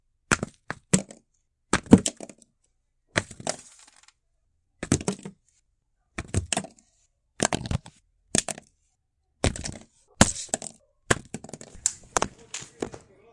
Thumps and bumps of plastic
dropped a plastic bottle over some plastic sandals, recorded this with a phone and WO mic to record directly to my computer inside audacity and edited noise out